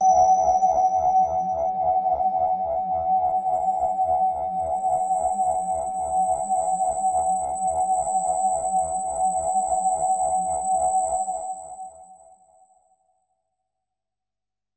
High Resonance Patch - E5
This is a sample from my Q Rack hardware synth. It is part of the "Q multi 006: High Resonance Patch" sample pack. The sound is on the key in the name of the file. To create this samples both filters had high resonance settings, so both filters go into self oscillation.
electronic, multi-sample, synth, resonance, waldorf